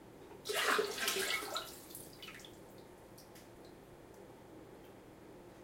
Dumping Soup into Toilet (short)
Dumped some old homemade soup out and decided, hey, this is a good chance to make a sound file :) this one was after adding water and rinsing out the lingering chicken pieces.